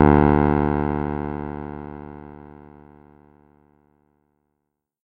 002-JEN Pianotone -D2
Jen Pianotone 600 was an Electronic Piano from the late 70s . VOX built a same-sounding instrument. Presets: Bass,Piano and Harpsichord. It had five octaves and no touch sensivity. I sampled the pianovoice.